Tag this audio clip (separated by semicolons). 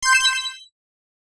alert; beep; bleep; button; click; confirmation; game; gui; interface; menu; ui